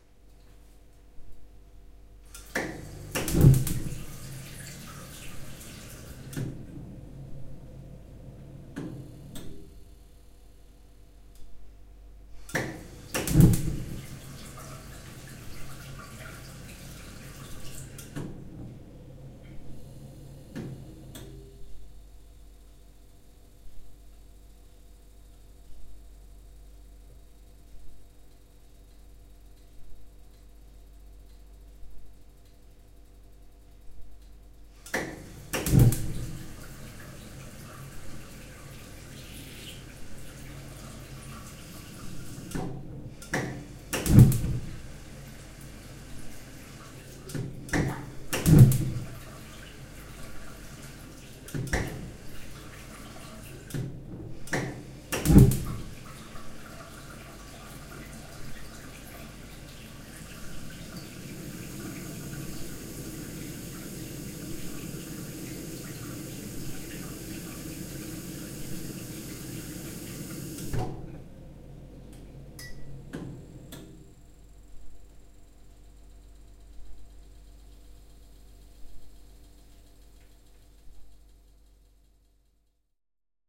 Gas owen lighting
Jamming with gas owen that turns on when I pour hot water.
bathroom, boiler, gas, owen, water